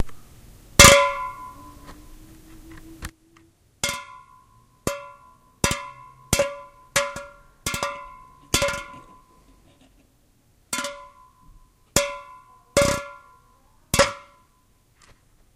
raw tincan
Foley cans clanging sound created for a musical tribute to the movie, warriors.
tin, aluminum, can, soda